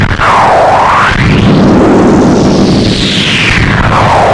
Thierry-Baumelle03
feedback of a big muff pedal on a phaser pedal
son continu et complexe
Masse: son seul et complexe
Timbre: sombre et aggressif
Grain: énormément de grain, le souffle venant d'une pédale de distorsion poussée au maximum,
Allure: utilisation d'un phaser, le son imite un peu les effets d'un flanger
Dynamique: aucune attaque
profil mélodique: variation glissante suivant l'oscillation du phaser
Profil de masse: allant du plus grave au plus aigu possible par la pédale (qui comporte une possible variation dans la portée des fréquences)
phaser, flanger